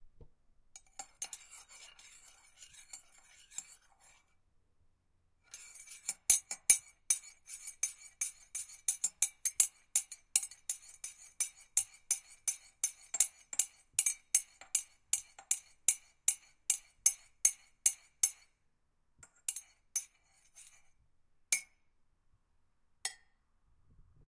MOVEMisc stirring dry cup. TAS H6
Recorded with a Zoom H6 and Stereo Capsule. stirring a cup with no water in it and using a metal teaspoon.
cup, spoon